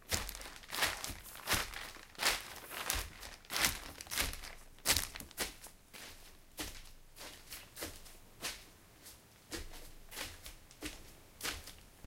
Walking on plastic sheet. Recorded with ZOOM H1
abstract, authentic, footsteps, indoor, plastic, strange, walking, weird